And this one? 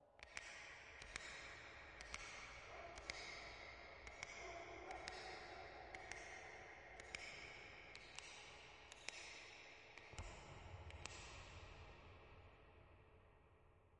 water dripping
dripping, film, OWI, water